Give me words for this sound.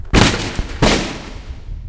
sound effects - gate bash in street
A gate being heavily kicked.